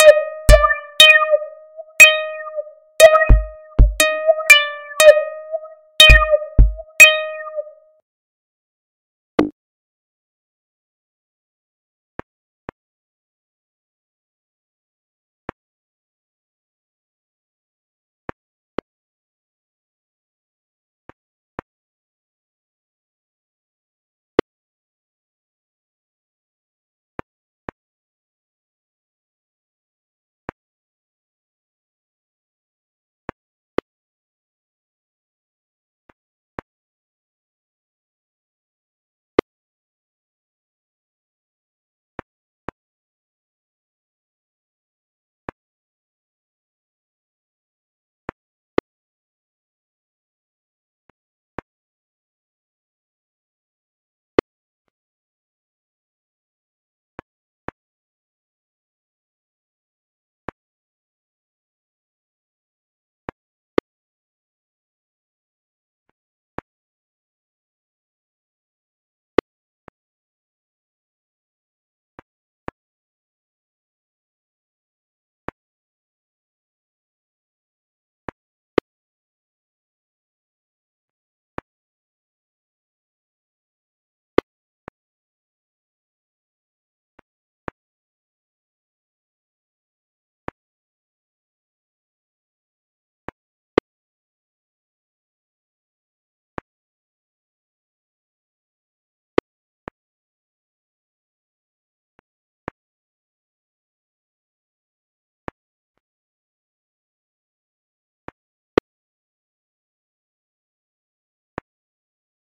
I particularly like the rhythm of the click, distorted without realizing the effect it would have. Serendipitous.
Have at it.